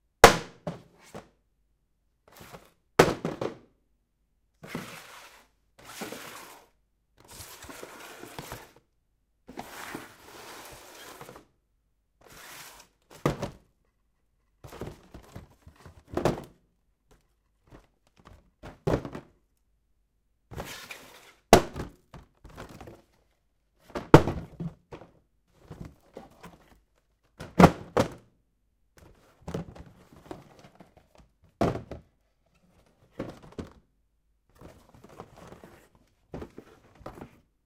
Paper Box Falling and Sliding
Dropping an empty paper box for noodles in on the floor.
Result of this recording session:
Recorded with Zoom H2. Edited with Audacity.
sliding, slide, Paper, falling, box, friction, fall, cardboard